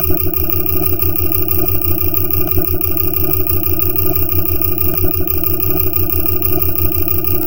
Sound of four cylinder diesel motor.